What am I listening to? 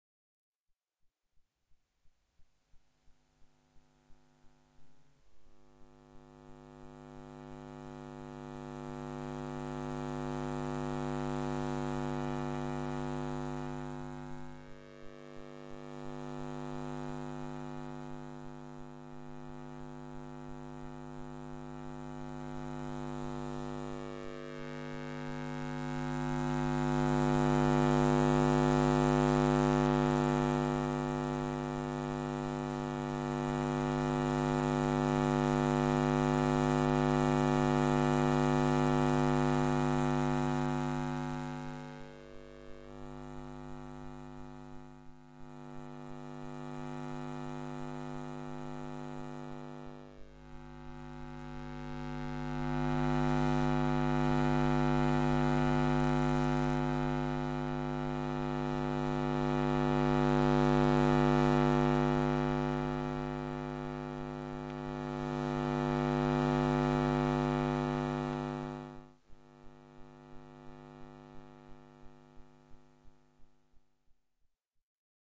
Basement Mains
electronic, experimental, sound-trip, sound-enigma